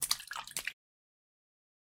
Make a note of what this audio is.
Double Splash 001
pouring, aqua, Lake, Splash, Run